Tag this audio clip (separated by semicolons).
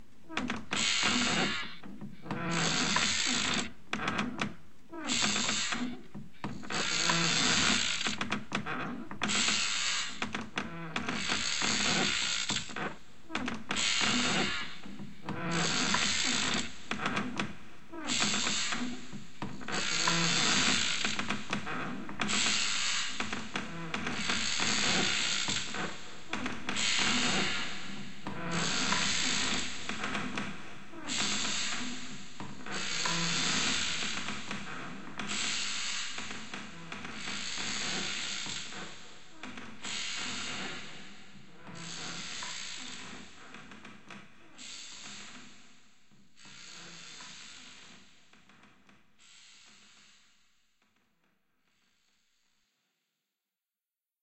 chair; slow; rocking